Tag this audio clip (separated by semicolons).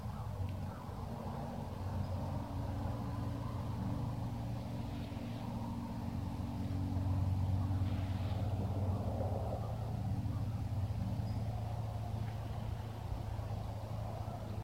noise; street; highway; traffic